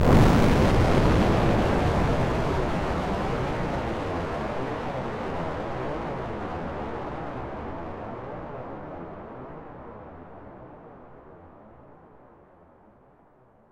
This launch is created with V-Station + some of my explosions in the package I uploaded recently. The sound is when a torpedo is launched above water, so it is much more crispier in it's sound then the other two.